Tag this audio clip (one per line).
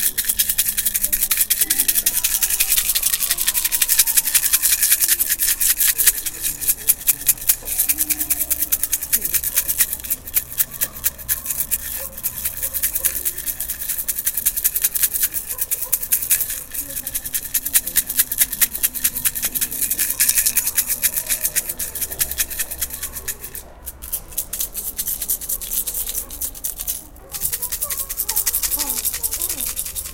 aes
Fieldrecording
Lama
Sonicsnaps